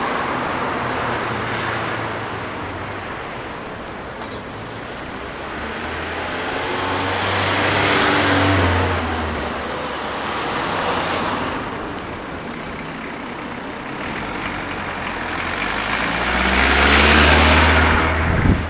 traffic outside car
Outside, cars passing by.
Recorded with Edirol R-1 & Sennheiser ME66.
car, city, town, traffic, cars, field-recording, passing-by, outside